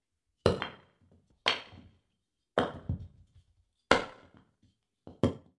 Sound of banging to wood cubes.
bum, reverb